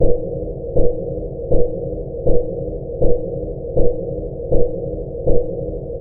STM3 thumper 3
Same as thumper_1 except more very deep but faint bass. The mids hit a little harder.
beat, under-water